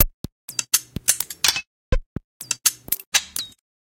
ClickerGroove 125bpm05 LoopCache AbstractPercussion
Abstract Percussion Loop made from field recorded found sounds
Abstract, Loop, Percussion